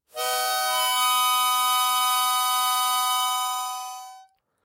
Harmonica recorded in mono with my AKG C214 on my stair case for that oakey timbre.
b, harmonica